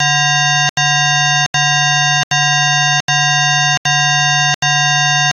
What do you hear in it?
office fire alarm
A sparse, low, repeated sound audible enough and present
enough to get the attention of anyone in an office
environment. The simple tone was derived by an auto
phase module found in the Audacity platform. The sound
effect was essentially "squeezed" out by increasing the
tempo. The tempo made the sample shorter so I could
run the sound through the harmonic generator.
alarm, environmentally-ergonomic